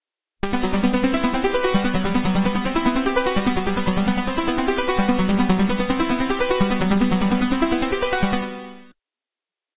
The Pitch bender on my synth stopped working right so I decided to make some recordings with the broken wheel. PBM stands for "Pitch Bender Malfunction" and the last number in each title is the BPM for timing purposes. Thanks and enjoy.
Drones, Synth